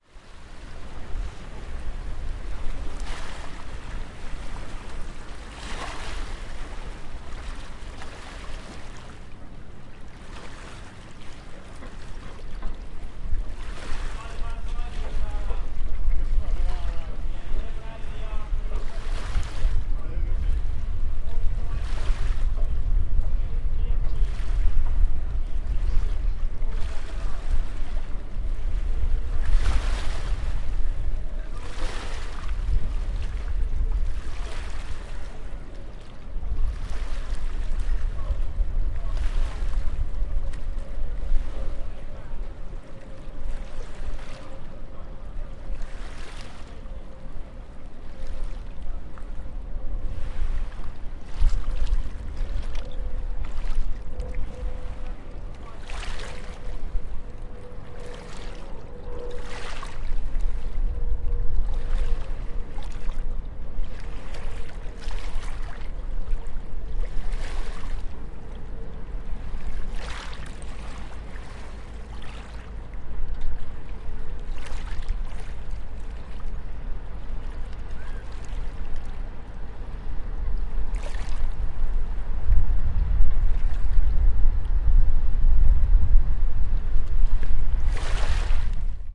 River waves thames with people in boats on water and children playing nearby
Water splashing at edge of Thames recorded with a Zoom H1 on a summers day with people on boats on the water and children playing nearby
Children, River, Splash, Water, Waves